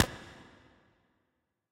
Sine waves processed randomly to make a cool weird video-game sound effect.
random, glitch, fx, video, effect, game, electronic, processed, pc